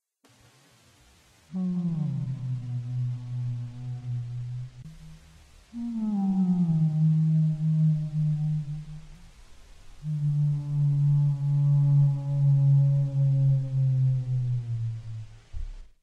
My voice, three "moans" slowed down/pitch changed with reverb added to sound like a humpback whale. Might need to use noise reduction for the popping/clicking when you use it. I made this because Icouldn't find a good clear whale song.
whale, water, song, fish, singing, blue, humpback, under